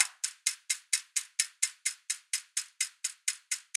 Clock 128 bpm
128, clock, tick, ticking